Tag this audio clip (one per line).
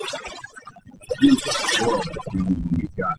assignment
wiener